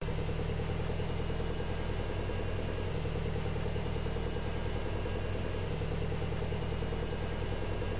washing machine D (monaural) - Spin 2
Original 3s field recording pitch-shifted to remove pitch variation due to change in spin speed. Then three concatenated with fade-in/fade-out to create longer file. Acoustics Research Centre University of Salford
processed, recording, washing-machine